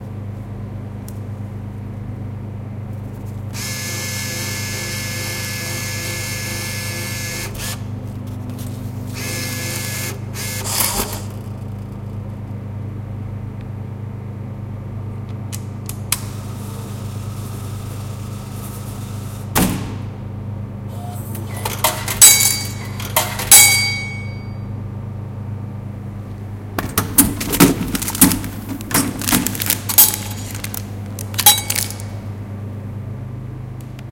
Snack Automat Westfalenkolleg
a snack machine in school